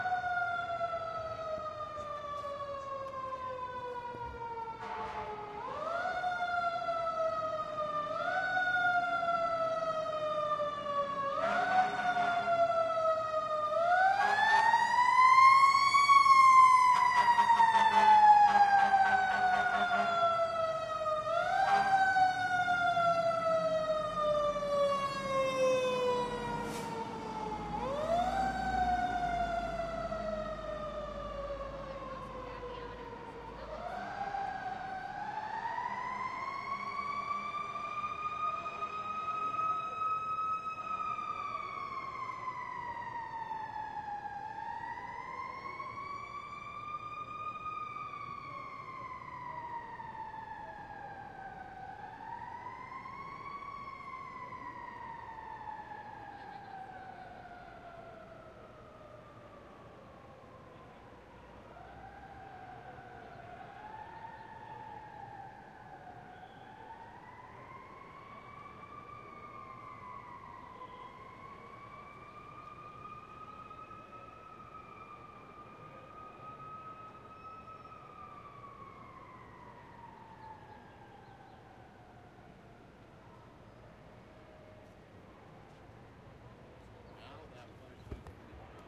FIRE ENGINE long approach and drive away
A fire engine approaching, then slowly passing, then disappearing into the distance. This was in Gallery Place in Washington, DC, during rush hour, so traffic was slow; thus, a longer than usual siren.
traffic, ambiance, noise, atmosphere, field-recording, city, ambient, engine, siren, ambience, soundscape, background, street, general-noise, Fire